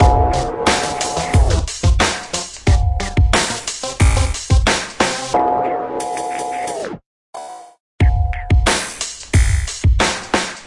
country song012
loop, synthesizer, hip-hop, dubstep